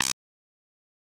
A collection of Samples, sampled from the Nord Lead.